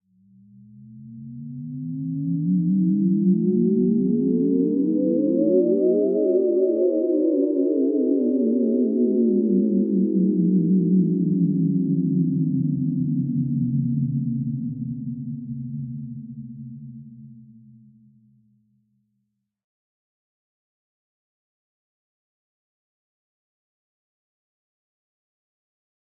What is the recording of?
Retro ufo fly by
synthetized in serum
sci-fi, sine, upanddown